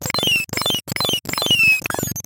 Little beep mellody.
Created using a VST instrument called NoizDumpster, by The Lower Rhythm.
Might be useful as special effects on retro style games or in glitch music an similar genres.
You can find NoizDumpster here:
artificial, beep, beeping, computer, digital, electronic, glitch, harsh, lo-fi, noise, NoizDumpster, TheLowerRhythm, TLR, VST